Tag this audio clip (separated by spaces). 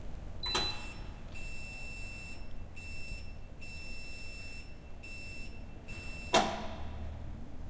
turnstile beep school